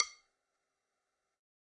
Sticks of God 006
drum, drumkit, god, real, stick